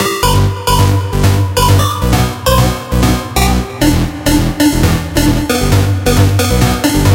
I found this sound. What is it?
Overworld Synth and bass
A full loop with video game sounding synths with modern sounding dance music. Perfect for happier exciting games. THANKS!
happyhardcore, palumbo, sega, synth1, tim, trance, videogame, v-station